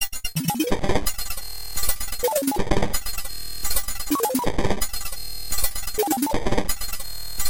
8 seconds of my own beats processed through the excellent LiveCut plug-in by smatelectronix ! Average BPM = 130
cymbal, idm, processed, loop, livecut, beat, glitch, bell, metal